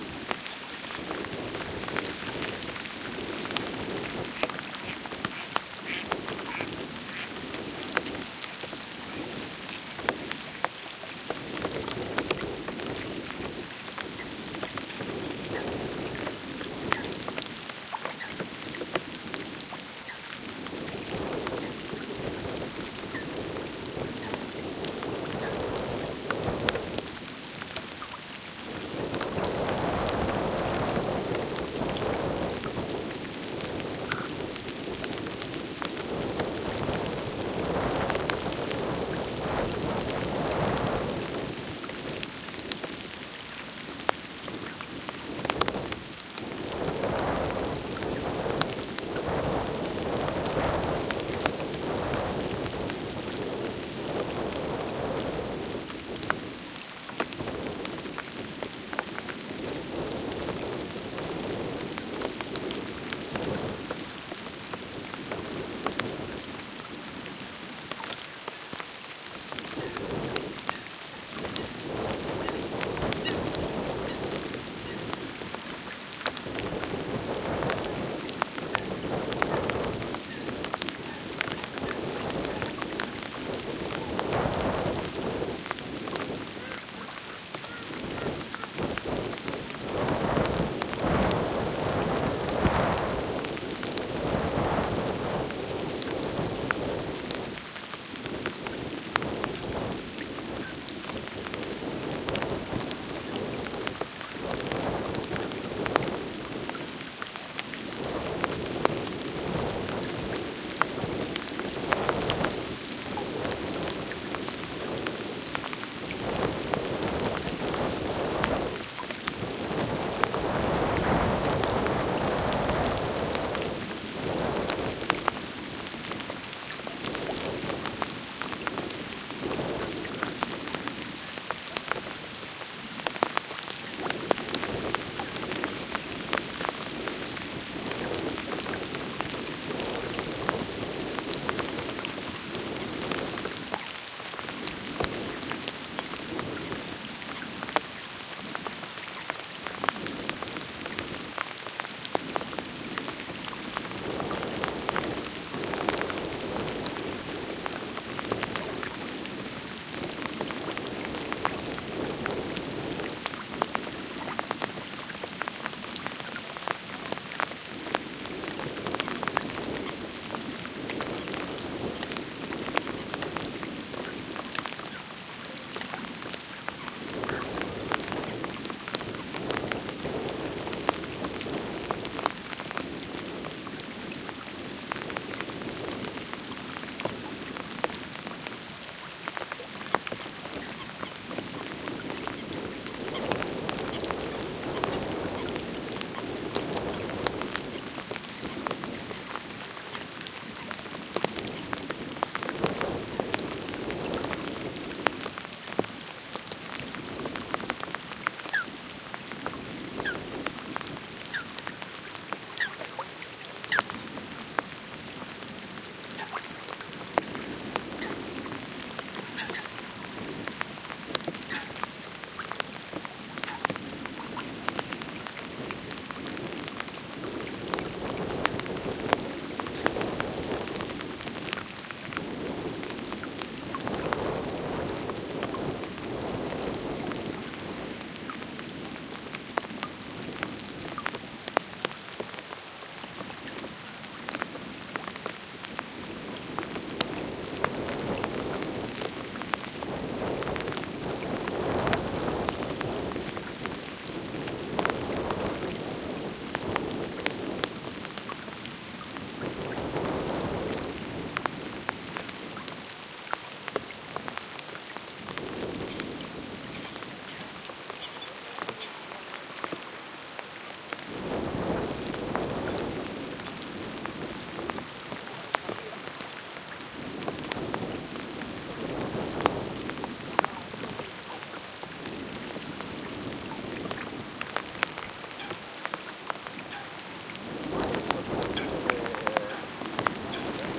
Rainy day in the marshes of Donana, S Spain. Distant bird calls, raindrops on the windscreen over the mics, and wind rumble. Sennheiser MKH60 + MKH30 into Shure FP24 preamp and Olympus LS10 recorder. Decoded to Mid Side stereo with free Voxengo VST plugin